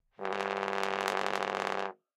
One-shot from Versilian Studios Chamber Orchestra 2: Community Edition sampling project.
Instrument family: Brass
Instrument: OldTrombone
Articulation: buzz
Note: G1
Midi note: 32
Room type: Band Rehearsal Space
Microphone: 2x SM-57 spaced pair